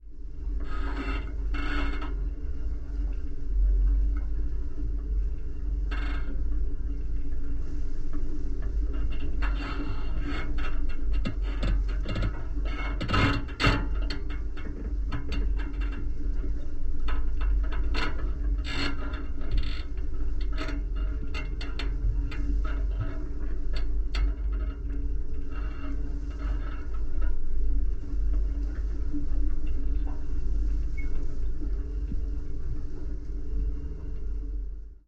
steelcable deck contact2
cable, boat, steel